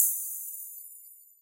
just a ride cymbal